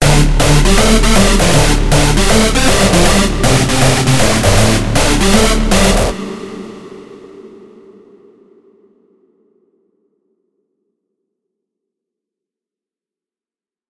Hardstyle FL Studio Fail + Vital Test
A failed hardstyle project that I made in FL Studio 20.